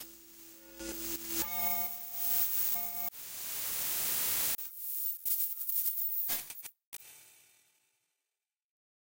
bumble seeds
created this collage using sound forge and some white noise to start off with - only effected using cut, paste, eq, reverse, and reverb
experiment, crackle, crunch, texture, hiss, smear, glitch